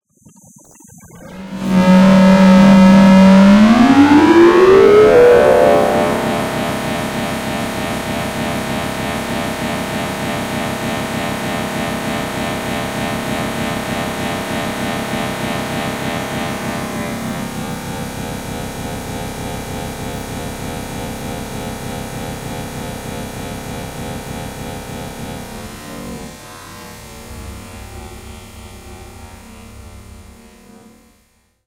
Time Nightmares - 01
Time dilation dilated into concave ambient drone washes.
ambiance, ambience, ambient, atmosphere, atonal, deep, digital, distorted, drone, effect, future, horror, nightmare, pulsating, sci-fi, sfx, sound, sound-design, soundeffect, synth, time